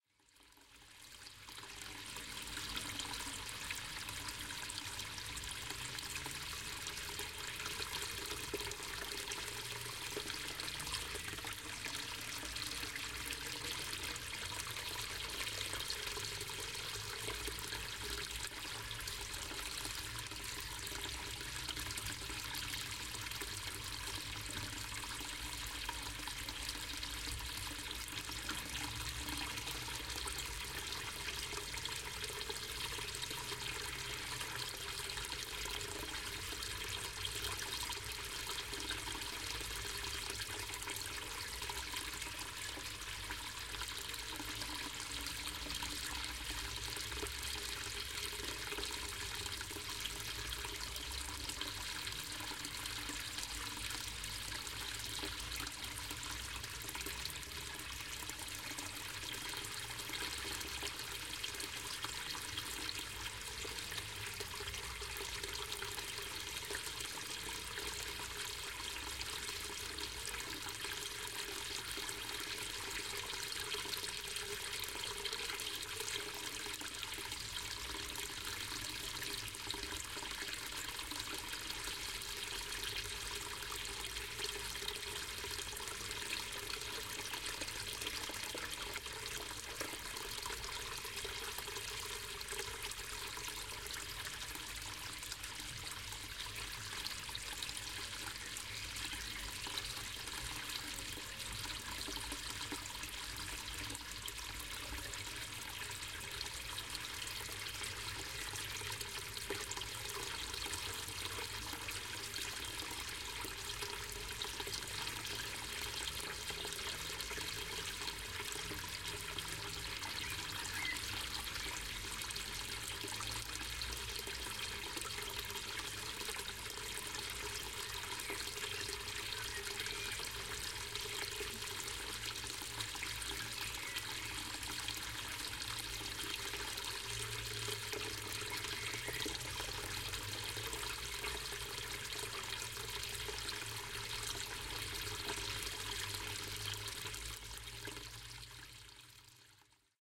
Tiny Waterfall
May 29th 2018 at 4 P.M
This is a recording I did near a tiny water dam. This is a small stream of water falling from a few feets only.
recorded with a Tascam DR-40 with the built in microphones on XY position.
Slightly processed in Adobe Audition with some EQ and gain correction.
cascade, creek, field-recording, flow, nature, river, stream, water, waterfall